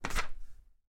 Page Turn 28
35/36 of Various Book manipulations... Page turns, Book closes, Page
newspaper, page, book, magazine, read, flip, turn, paper, reading, flick